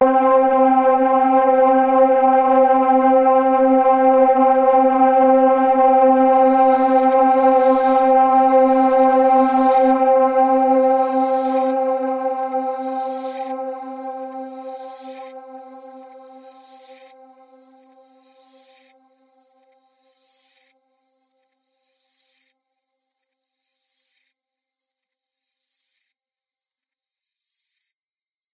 A very dark and brooding multi-sampled synth pad. Evolving and spacey. Each file is named with the root note you should use in a sampler.
dark, granular, multi-sample, ambient, multisample, synth